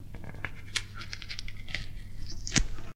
note: these samples maybe useful for horror media.
smiles to weebrian for the inspiration, the salads on me (literally)
(if this sound isn't what you're after, try another from the series)
squelch, bones, flesh, horror, fx, horror-fx, neck, break, horror-effects, limbs, arm, leg, effects, torso